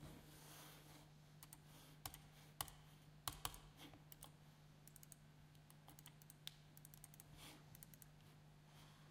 Clicking of a mouse